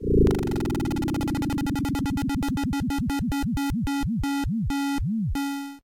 Dropping and buzzing
8-bit, 8bit, arcade, chip, chippy, decimated, game, lo-fi, noise, retro, video-game